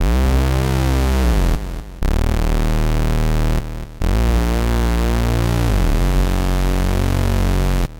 Electronic bass created with the (D Beam)synth of the Roland SP-555.